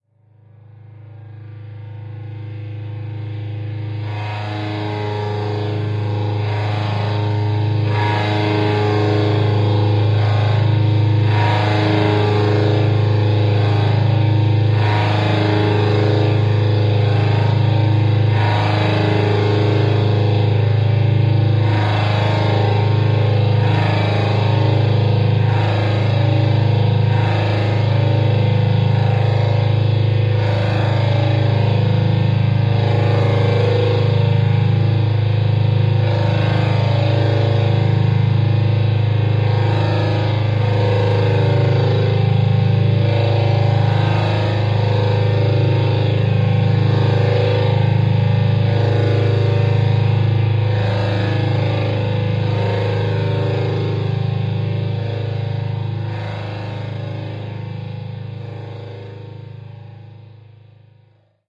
Same setup as sample "feedback1", but in this case the low E string was dampened. This permitted the A string to take off with a more chaotic type of feedback. Where "feedback1" sounds like some kind of alarm klaxon, this one sounds more organic. Still very sci-fi though.